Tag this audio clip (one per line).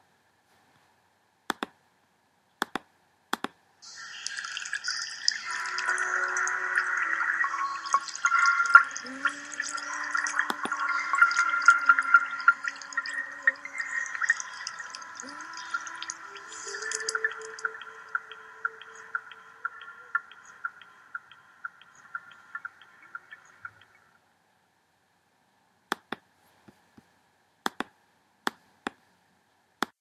ambient
pizzicato
water